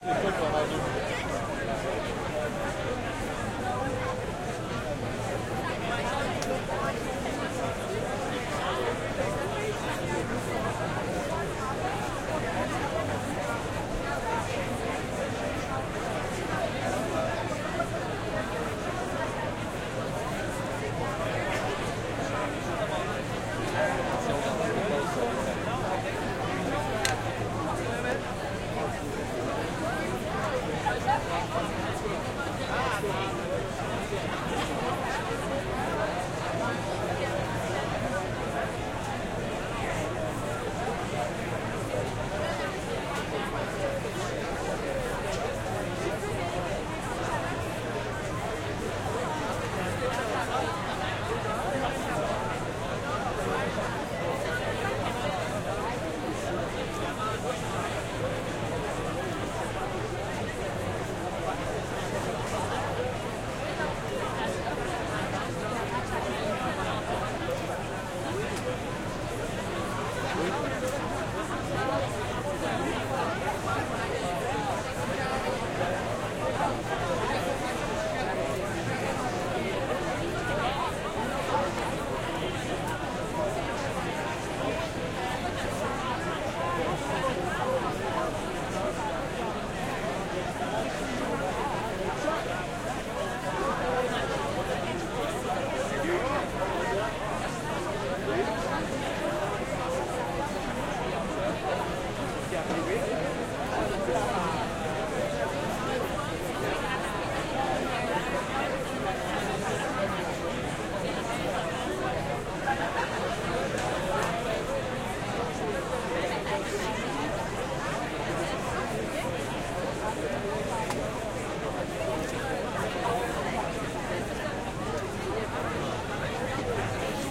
crowd terrasse
Busy outdoor crowd talking and laughing outside St-Sulpice bar in Montreal, recorded with microtrack's T-microphone